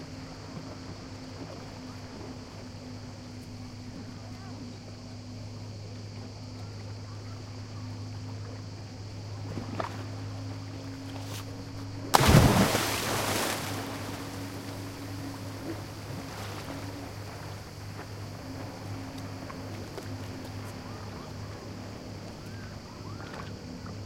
Surround recording of somebody jumping of a stone jetty into the basin of a small fishing harbor, from a height of about 3m. It is a warm summer evening, and some crickets can be heard above the waves gently lapping on the jetty. The jump and corresponding splash take place in the middle of the recording, the recorder is situated on the jetty next to the jump-spot, facing the harbor basin.
Recorded with a Zoom H2.
This file contains the front channels, recorded with a mic-dispersion of 90°